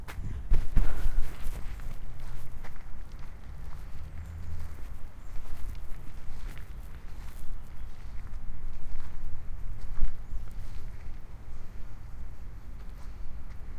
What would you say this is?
Pombas caminho terra
ambient-sound, de, leaves, natural-park, Parque, pigeons, Porto, Serralves, ulp-cam